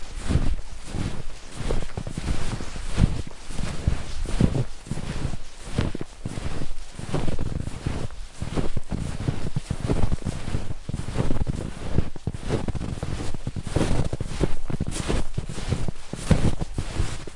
fotsteg i djupsnö 1
Footsteps in deep snow. Recorded with Zoom H4.
deepsnow, footsteps, snow